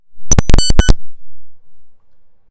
Electronic Glitch
Audio glitch when recording with my interface. Could be useful to someone!
broken, glitchy, machine, short, weird